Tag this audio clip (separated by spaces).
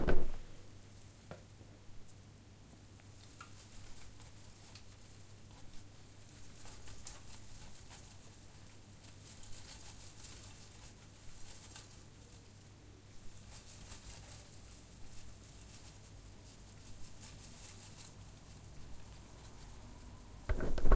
blind close field-recording wind